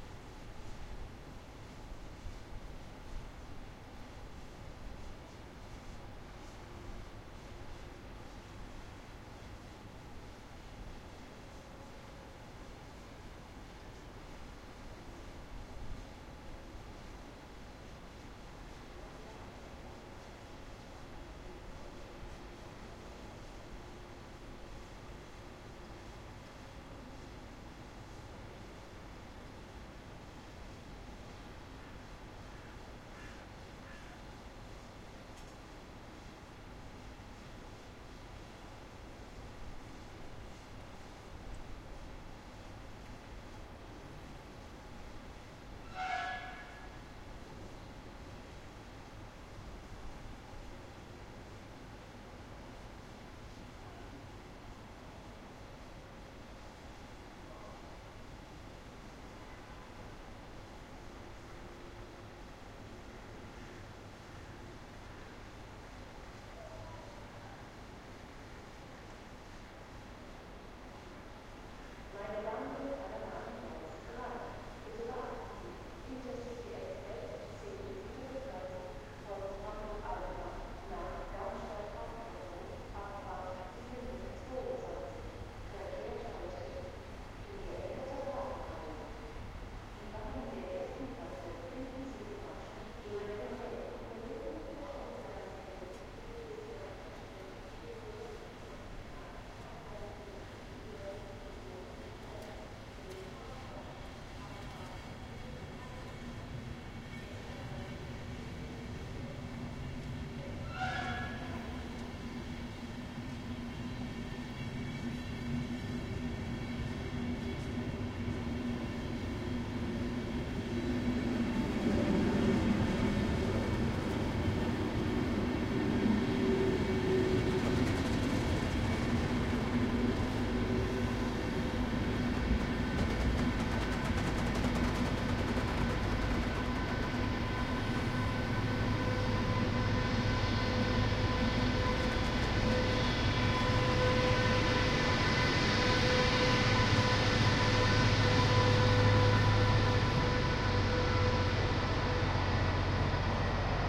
train arriving SR000R
First of three recordings done on a cold day in January 2009. A train arrives on Hanover railwaystation. This recording was done with a Zoom H2 recorder. The most unusual feature of the H2 is its triple quadruple mic capsule, which enables various types of surround recordings, including a matrixed format that stores 360° information into four tracks for later extraction into 5.1. This is the rear microphone track. With a tool it is possible to convert the H2 quad recordings into six channels, according to 5.1 SMPTE/ITU standard.